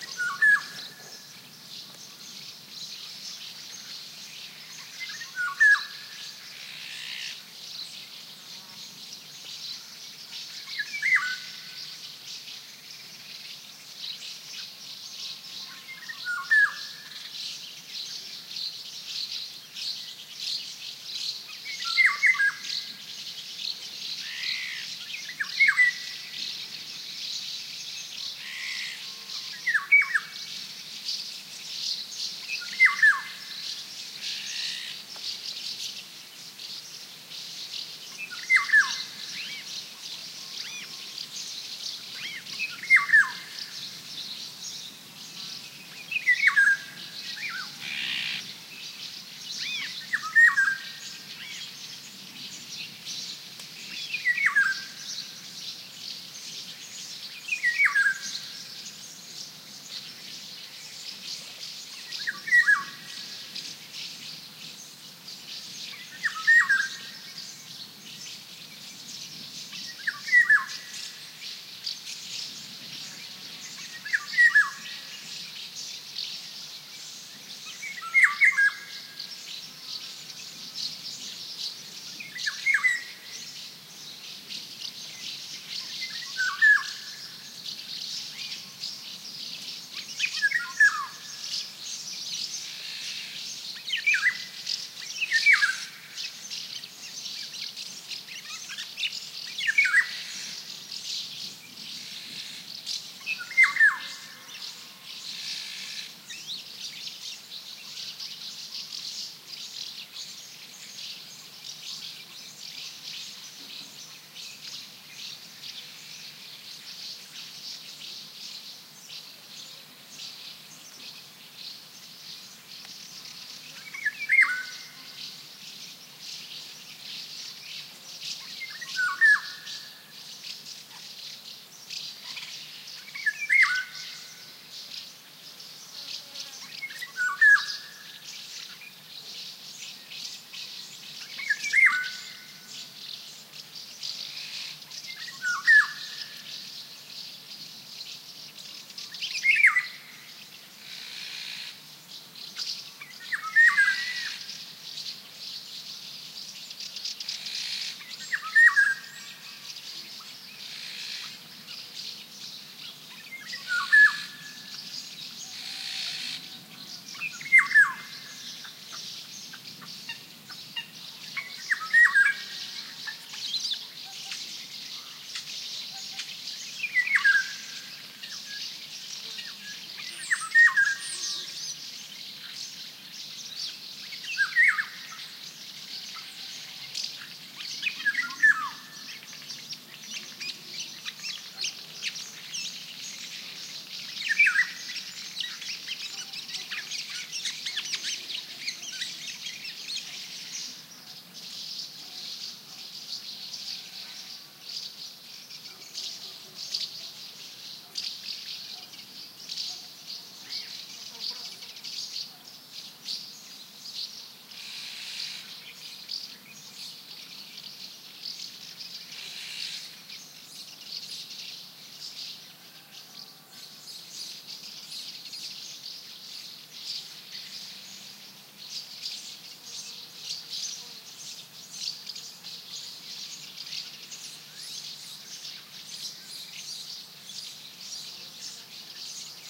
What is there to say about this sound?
20090506.golden.oriole
the flute-like, mysterious singing of one Golden Oriole (Orioulus oriolus, Spanish Oropendola), with chirps of House Martins in background. I couldn't see the bird, but my guess is it was some 200 m away from the mics, so it was a powerful singer (and powerful mics!). Recorded on the shore of Embalse Agrio Dam (Aznalcollar, Sierra Morena S Spain). Sennheiser MKH60 + MKH30 into Shure FP24, Edirol R09 recorder. Decoded to mid-side stereo with free Voxengo VST plugin
ambiance, house-martin, nature, oriole, oropendola, south-spain, spring